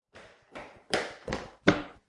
Someone running toward the mic
feet, foot, footsteps, running, shoe, shoes, step, steps